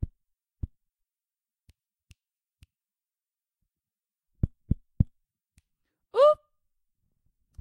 hit mic 2
test sm58 hit
sm58, hit, test